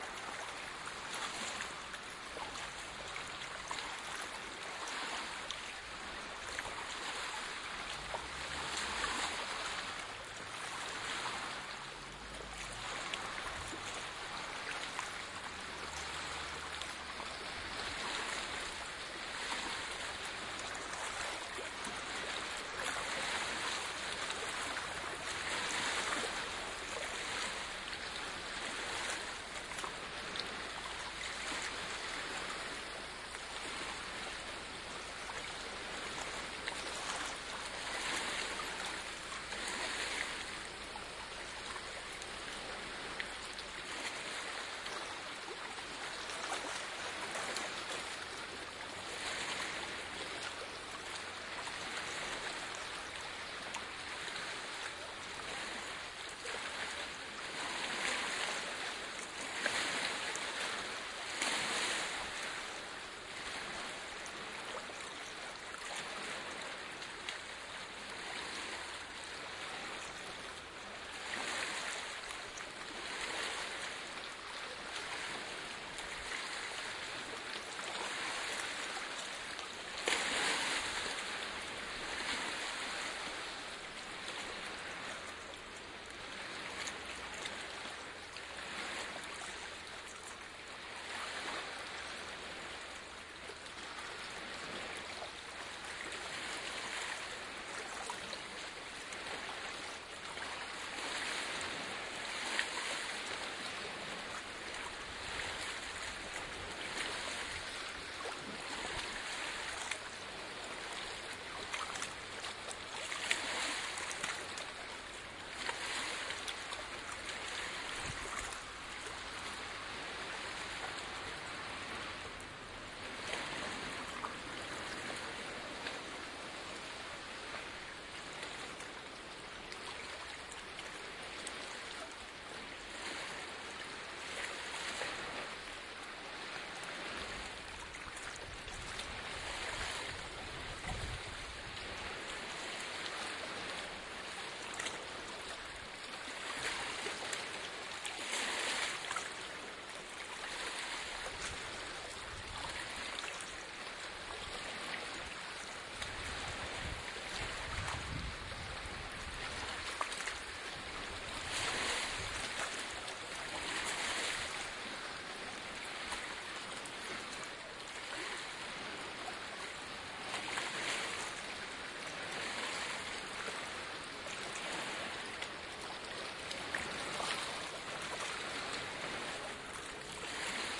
Binaural recording, standing at the shore of Loch Tay in Kenmore. There are some trafficnoises, but mostly the waves of the Loch. OKM microphones, A3 adapter into R-09HR recorder.